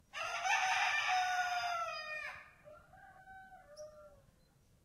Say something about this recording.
Morning rooster (local time 5a.m.). Recorder - Tascam DR100mk3, mic - LOM Usi Pro